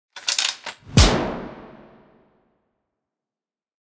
GASP Sniper Load and Fire 1
Sound FX for loading and firing a sniper rifle - variation 1.
Shot, FX, Weapon, Action, Sniper